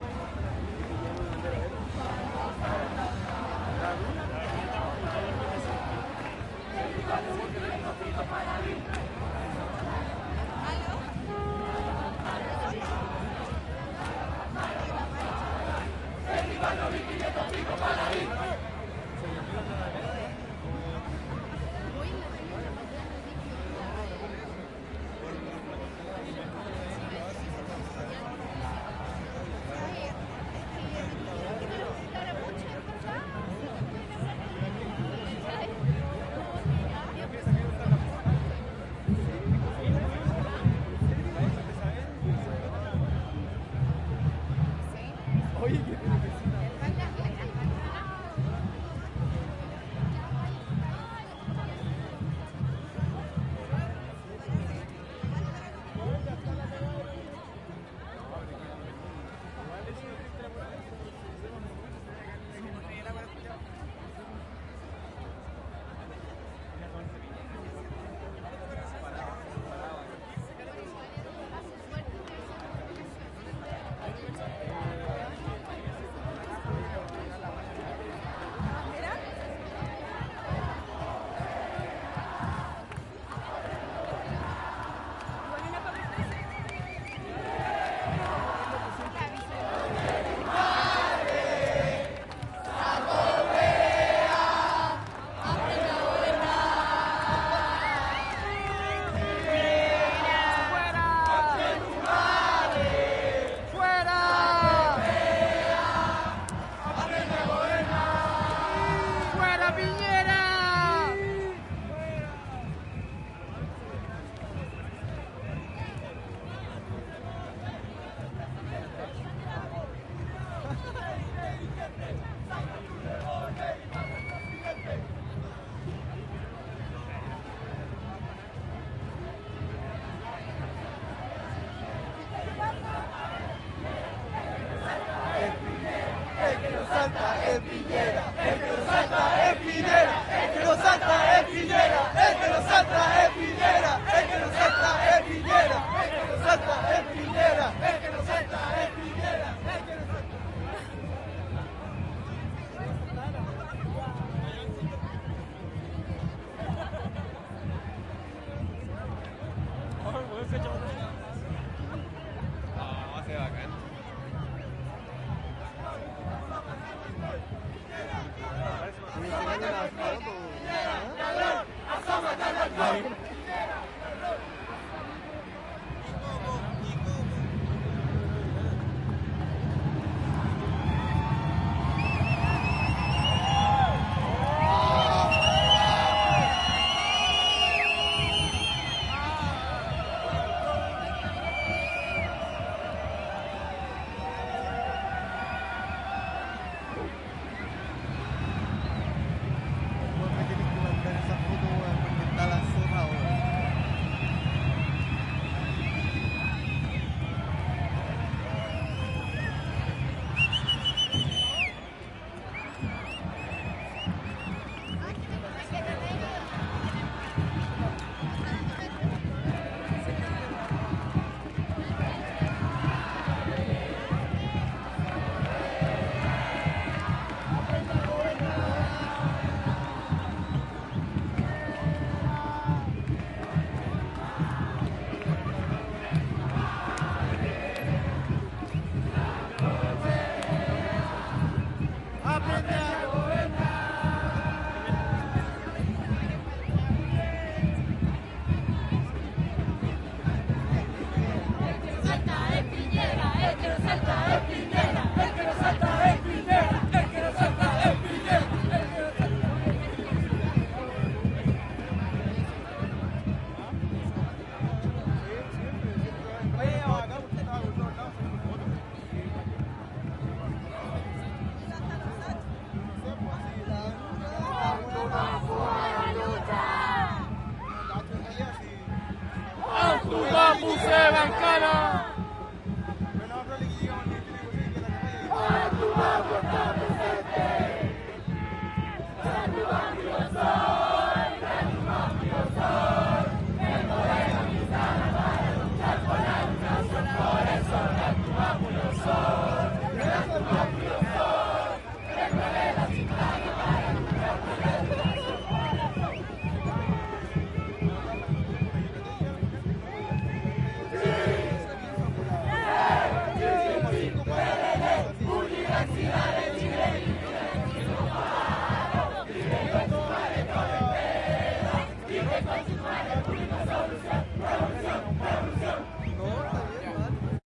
marcha estudiantes 30 junio 03 - más gritos y cánticos
Desde baquedano hasta la moneda, marcha todo tipo de gente entre batucadas, conversaciones, gritos y cantos, en contra del gobierno y a favor de hermandades varias.
Diversos grupos presentan algún tipo de expresión en la calle, como bailes y coreografías musicales en las que se intercruzan muchos participantes.
24.500
Lavín, en ese momento, era el ministro de educación. Aprende a gobernar (grito) ambiente más bien tranquilo. El que no salta, chiflidos, aprende a gobernar. Gritos de Antumapu y Universidad de chile.